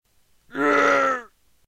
Death sound (male)
A simple sound of a man's death.
dead, Death, dying, killed, male, man, quick, sound